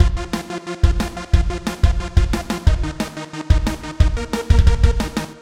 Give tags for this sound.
beat drum drumloop loop techno trance

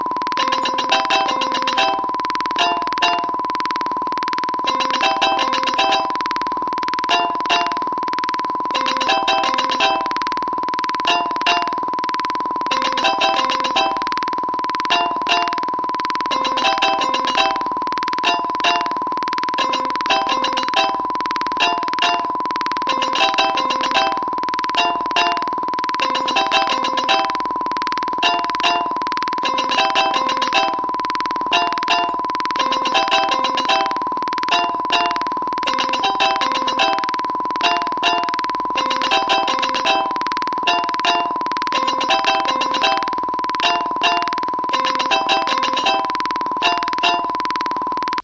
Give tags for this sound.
building-lumps fragments loops

